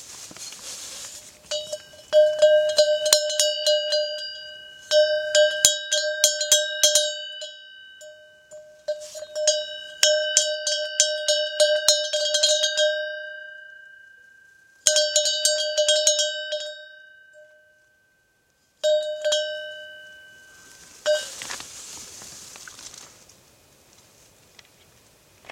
the bell of a mare in the forest.
recorded with zoom H4N
mountains of Basque Country
bell, herd, mare